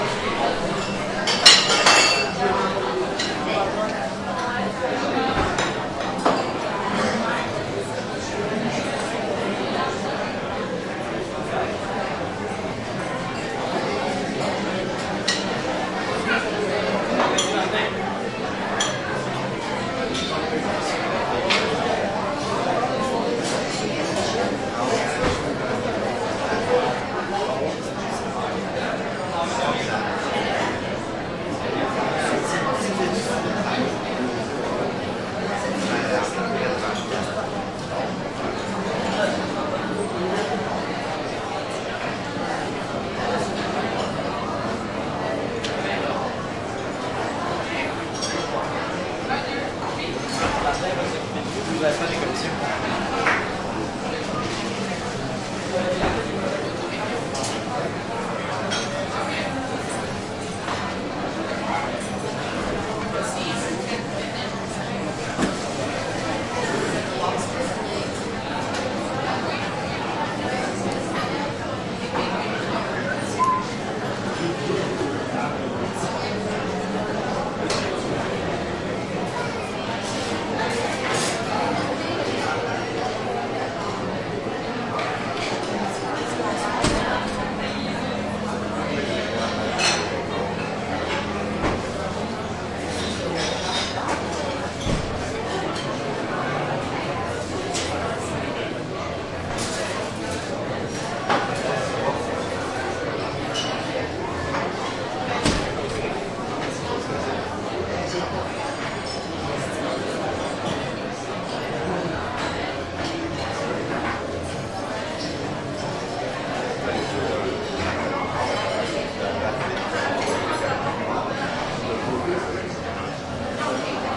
crowd int medium busy restaurant at entrance Montreal, Canada
busy, Canada, crowd, int, medium, Montreal, restaurant